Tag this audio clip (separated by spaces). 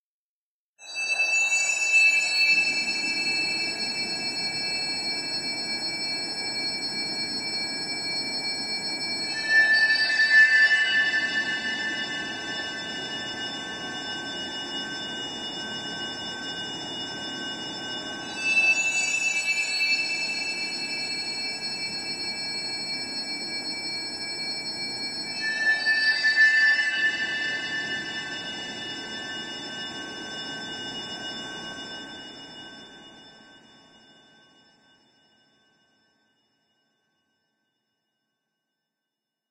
atmosphere deep drama dramatic horror movie music scary sci-fi soundscape space